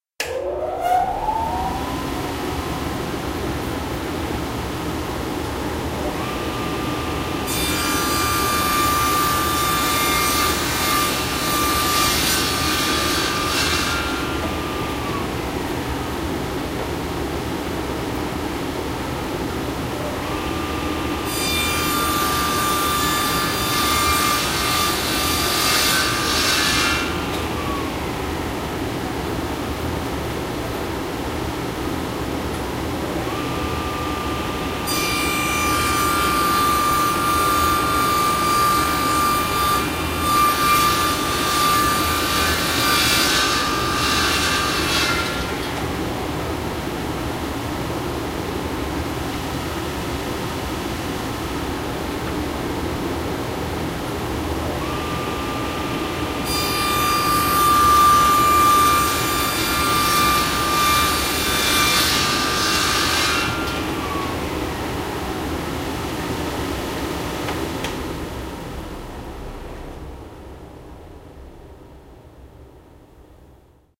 Smaller bench saw with wood dust extractor
I recorded these sounds whilst repairing a theatrical doorbell box. I used my old Edirol R09.
bench-saw, carpentry, saw, Industrial, electrical-machinery, wood-dust, extractor, sawdust